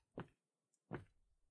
Walking on Wooden floor